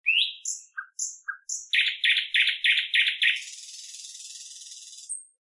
Bird, Thrush Nightingale 03
Little bird (Thrush Nightingale) tweets (background noise filtered out!).
This sound can for example be used in films, games - you name it!
If you enjoyed the sound, please STAR, COMMENT, SPREAD THE WORD!🗣 It really helps!
field-recording, nightingale, nature, birds, song, summer, tweeting, birdsong, forest, tweet, sing, woods, thrush, singing, bird